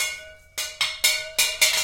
IMPROV PERCS 069 1 BAR 130 BPM
Sources were placed on the studio floor and played with two regular drumsticks. A central AKG C414 in omni config through NPNG preamp was the closest mic but in some cases an Audio Technica contact mic was also used. Two Josephson C617s through Millennia Media preamps captured the room ambience. Sources included water bottles, large vacuum cleaner pipes, a steel speaker stand, food containers and various other objects which were never meant to be used like this. All sources were recorded into Pro Tools through Frontier Design Group converters and large amounts of Beat Detective were employed to make something decent out of my terrible playing. Final processing was carried out in Cool Edit Pro. Recorded by Brady Leduc at Pulsworks Audio Arts.
130-bpm, acoustic, ambient, beat, beats, bottle, break, breakbeat, cleaner, container, dance, drum, drum-loop, drums, fast, food, funky, garbage, groovy, hard, hoover, improvised, industrial, loop, loops, metal, music, perc, percs, percussion